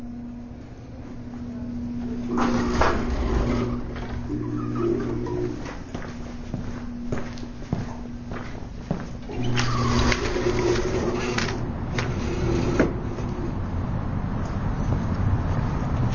I recorded this at the Enumclaw, WA public library as I exited the building through the 2 automatic doors.
Automatic, doors